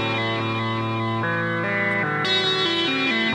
Taken from a Jam Man Loop of a Moog Prodigy
analog, loop, synth, instrumental, instrument